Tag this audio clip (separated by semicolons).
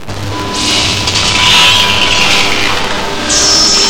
break; core; drums; glitch; idm